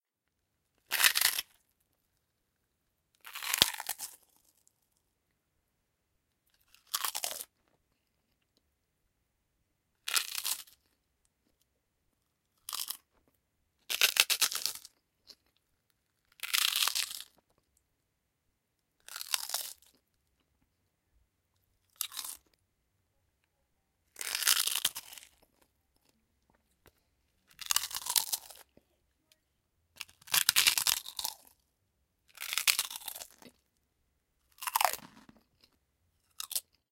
Biting, Crunchy, A
Raw audio of biting into crunchy and crispy prawn crackers. The chewing has been edited out, the sounds are simply each initial (and loud) bite of the cracker. It was tasty.
An example of how you might credit is by putting this in the description/credits:
The sound was recorded using a "H1 Zoom recorder" on 19th December 2015.